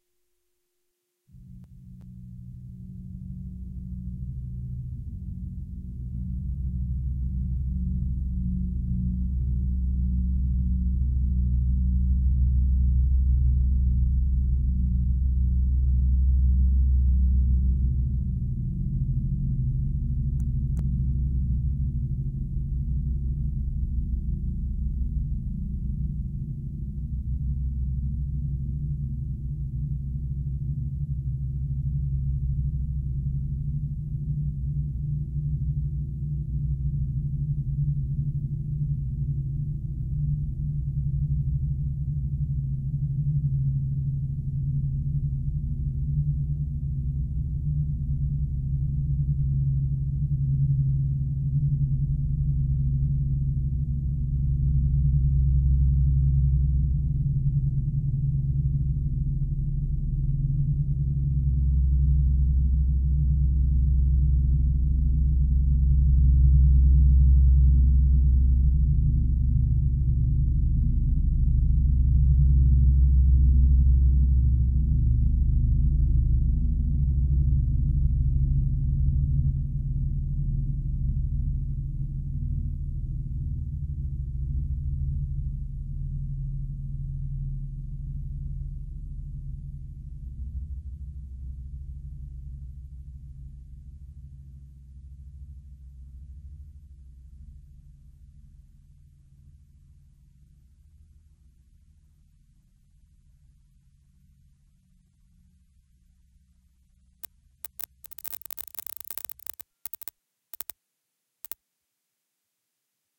A triple OSC with reverb, delay and echo.
It may be possible that there are some clics and bugs... sorry for that. This one was pretty hard to records properly.
darkness, reverb, sorrow, echo, gloomy, osc, black, space, evil, fx, glitch, dull, effect, deep, dark, shape, odds, cavern, cavernous